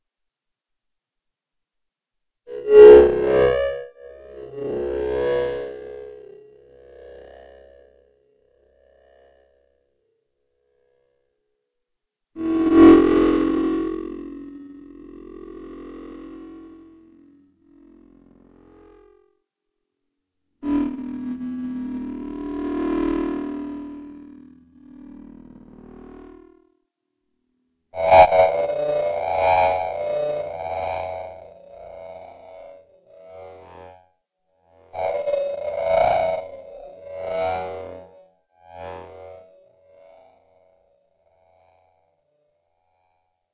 Slow, harsh, evolving distorted texture based on modulated delay lines from a Clavia Nord Modular synth.
delay, modular, evolving, clavia, harsh, bleep, slow, texture, distorted, fx, nord, synth